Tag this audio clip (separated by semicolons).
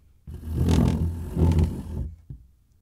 ambience; anhembi; arrastando; biblioteca; cadeira; chair; de; estudando; estudo; morumbi; moto; noise; parece; radio; room; sons; tv